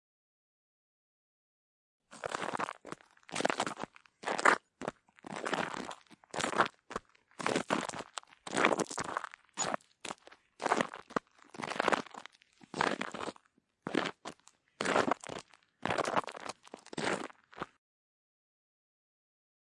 Swinging Walking
Swinging walk along a stony path.
footsteps, gravel, Panska, swinging, walking